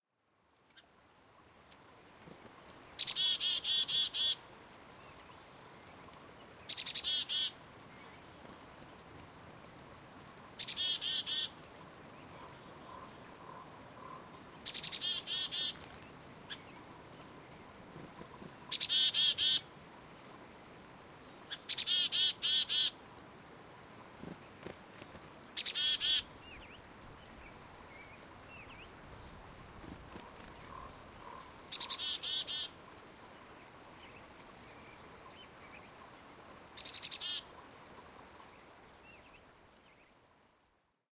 Black Capped Chickadee (Poecile atricapillus). You can also hear the intermittent 'chip' of the Song Sparrow in this recording.
black, capped